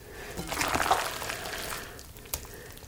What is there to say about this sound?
Pumpmkin Guts Squish 5
Pumpkin Guts Squish
pumpkin, squish, guts